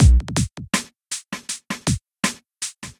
Rolling Trance Beat
A clubby trance beat. Check out others in my "MISC Beat Pack"
Club, Dance, House, Trance